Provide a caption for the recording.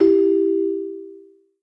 Bong Chime 1
Part of a games notification pack for correct and incorrect actions or events within the game.
alert, ambient, application, bleep, blip, bloop, cell, chime, click, computer, correct, desktop, effect, event, game, harmony, incorrect, indie-game, melody, music, noise, notification, ringtone, sfx, sound, tone, tones, videogame